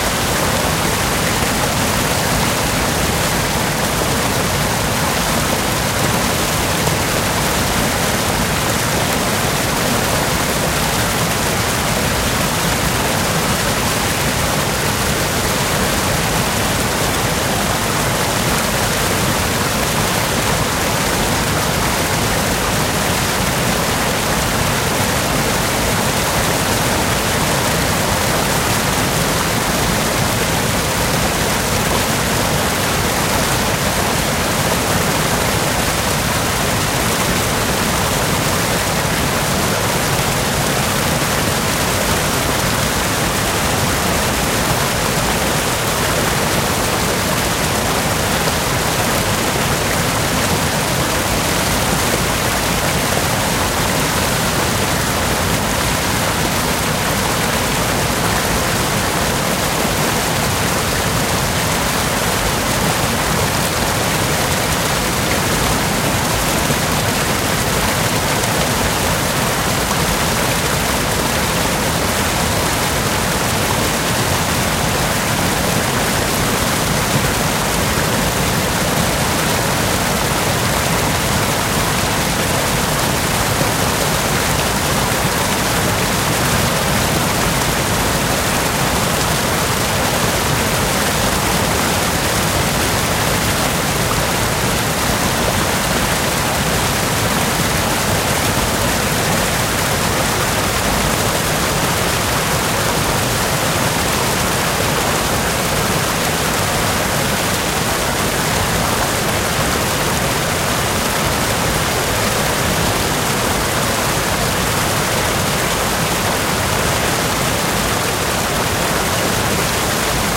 Stream Rapids 2
creek, marsh, outdoor, river, stream, streaming, water